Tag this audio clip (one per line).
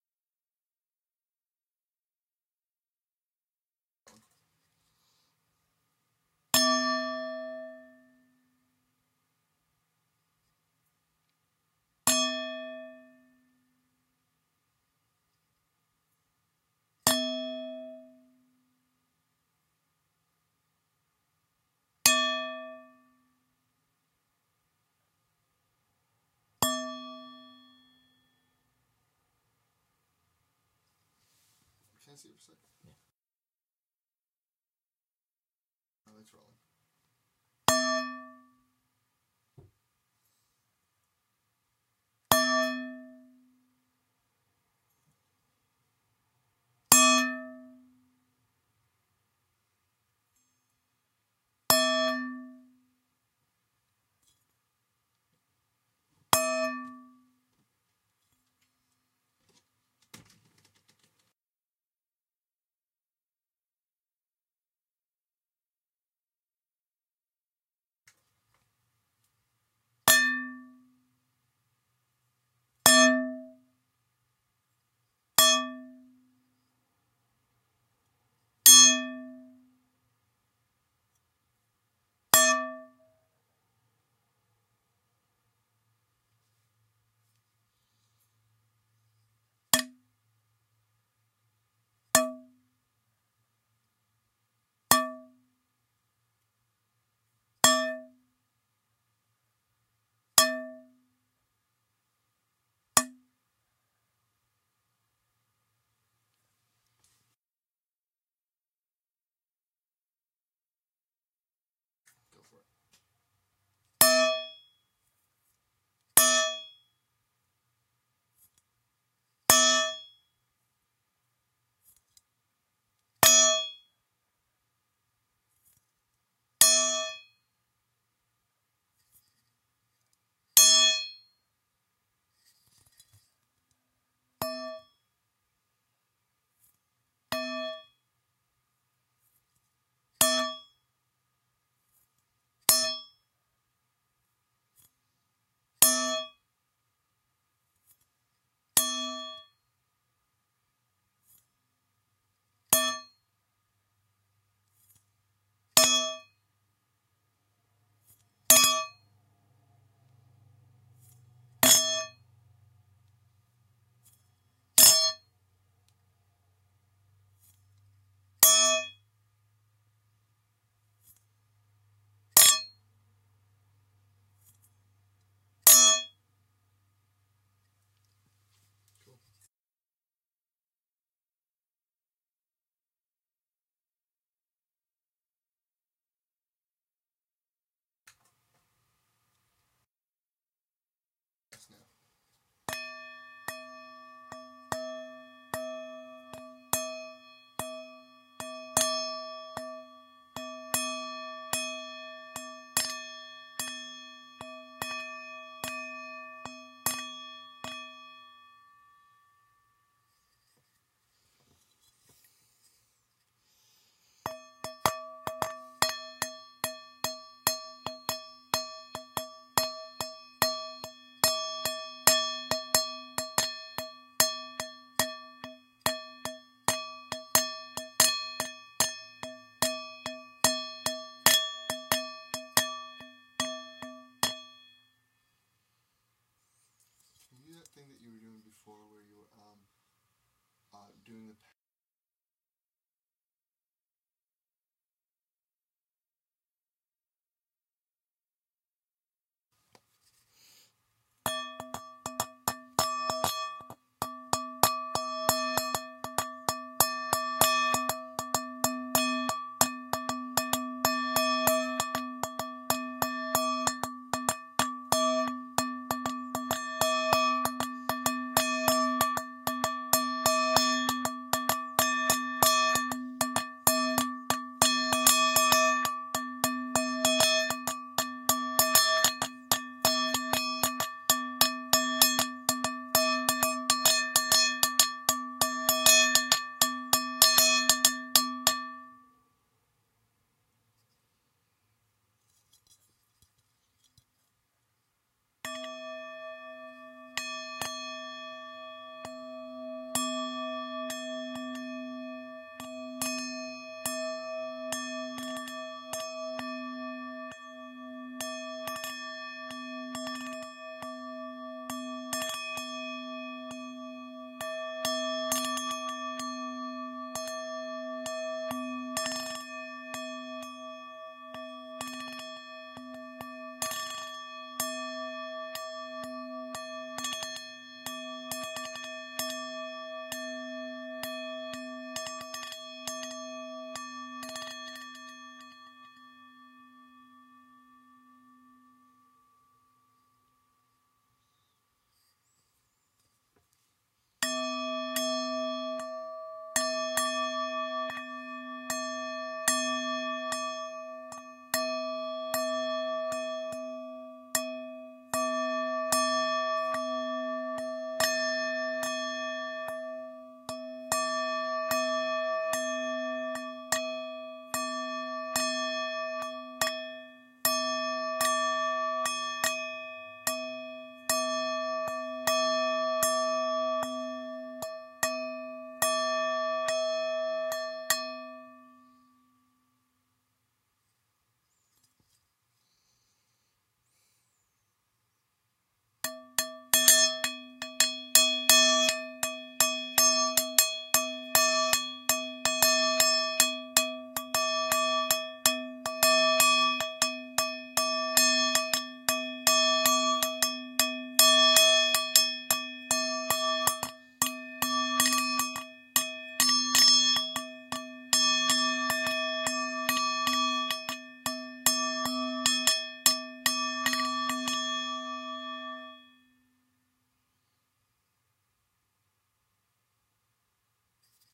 bowl
buddhist
hum
mongolian
monk
overtone
religious
resonance
resonate
sing
singing
tantra
tibetan
tone
undertone
yoga